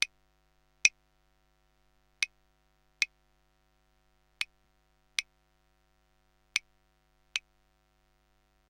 Claves (wooden blocks) played by me for a song in the studio.